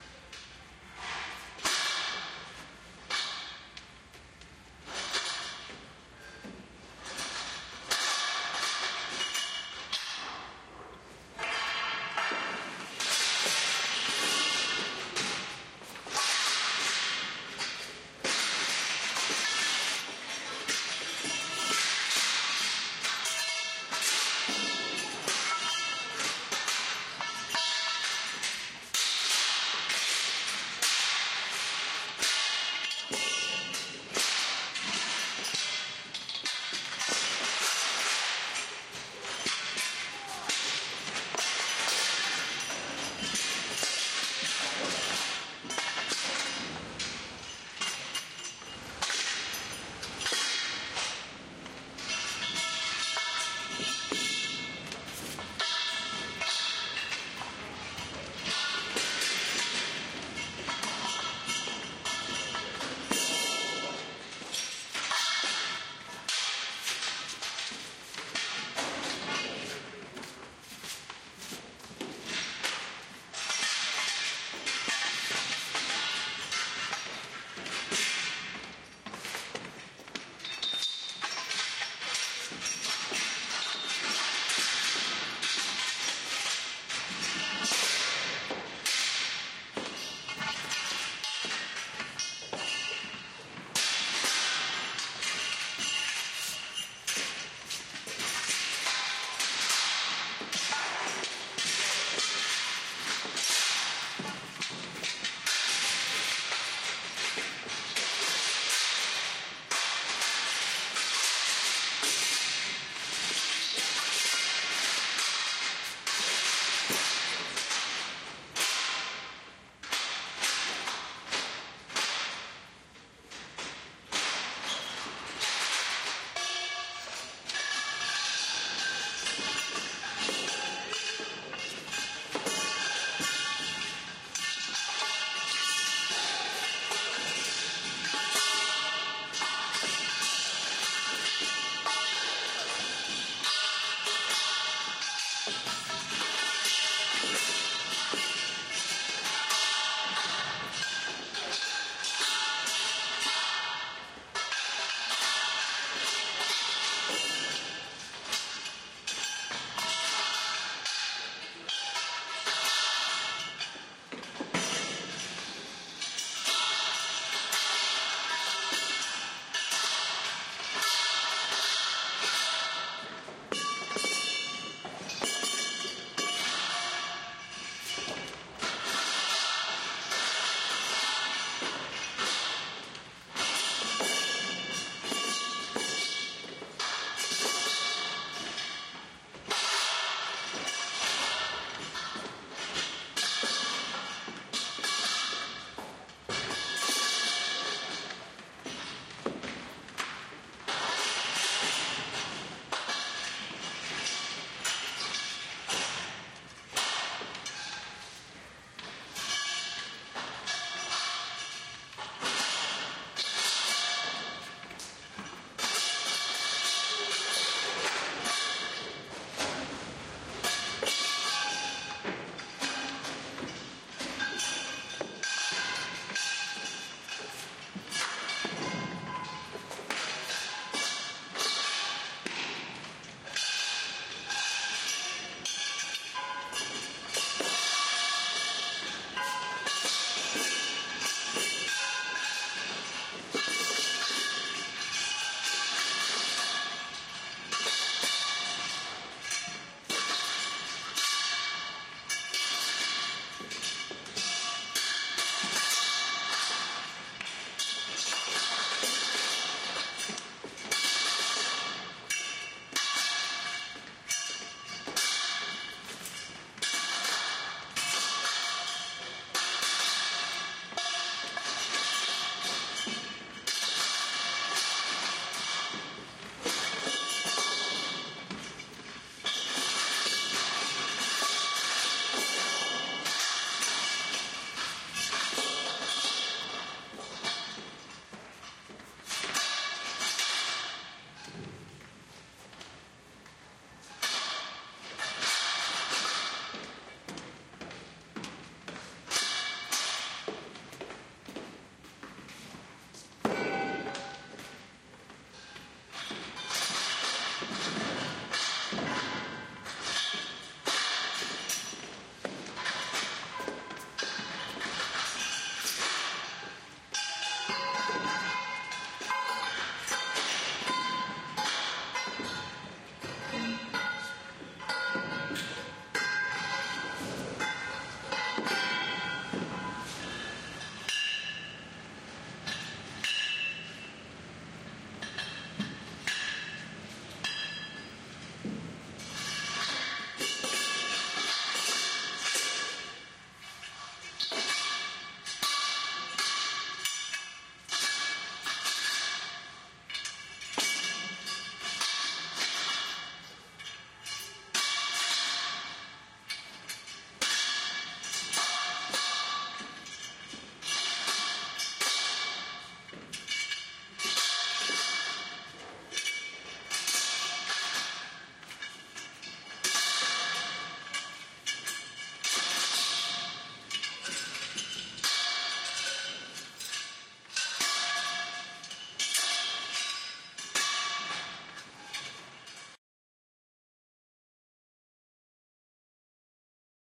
Lots of bangs, bongs, clangs and you-name-it-whats. Yesternight our theatrical trouppe had been disassembling the set; main part of it is a huge, multi-ton construction consisting of several dozens (or hundreds?) steel tubes - it looks like erecting scaffolding, but much more steady. Disassembling it is a chore even if you have 25+ people around. I have recorded the later stage: clanging tubes are dragged to the hoister in order to be brought down to the ground level and loaded into a truck. Speech is cut off (hopefully all of it). Have a good use of it.